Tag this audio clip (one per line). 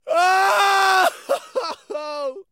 acting
agony
anguish
clamor
cries
cry
distress
emotional
grief
heartache
heartbreak
howling
human
loud
male
pain
sadness
scream
screech
shout
sorrow
squall
squawk
ululate
vocal
voice
wailing
weep
yell